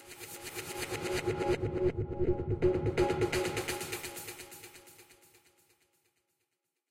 insane-dj-efx
percution efx made with fl studio(reaktor-molekular)